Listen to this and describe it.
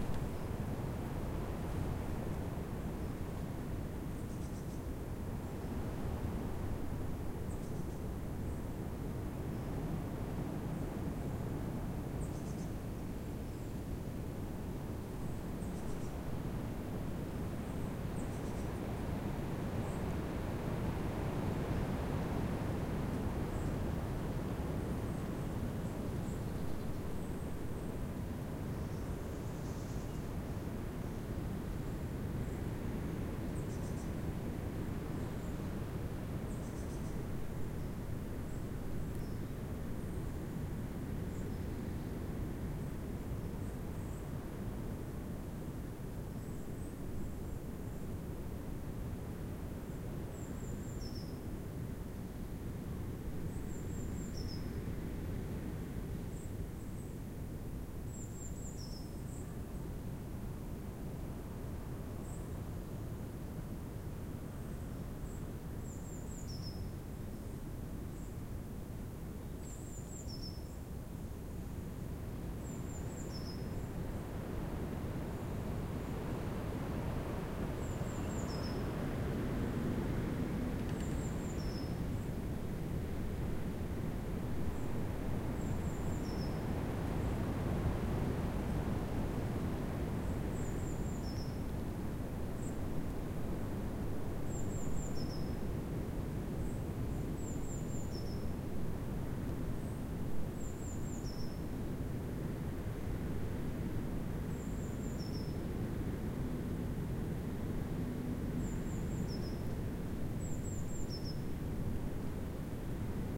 UK Deciduous Woodland in late Winter with wind through trees
Unprocessed wildtrack audio recorded with a Tascam DR-22WL with a Rycote softie on the ground in a woodland in North Somerset (Weston-super-Mare) in late winter. There was a strong wind in the canopy above, which was ebbing and flowing throughout the recording. Buds were just starting to burst on smaller tress and this was recorded at 11AM in the morning, during a lull while no visitors were walking through the area. The surrounding vegetation was mostly large beech trees, with undergrowth of ash and a very big holly bush, from which a European Robin (Erithacus rubecula) was singing. A pretty clean recording, if you're looking for something wind the sound of wind in it, but otherwise the wind is quite loud and might be distracting. A second version was recorded just after this, and is included in this same collection.